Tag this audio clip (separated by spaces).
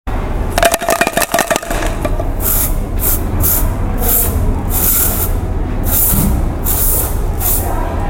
sonoros graff graffiti efectos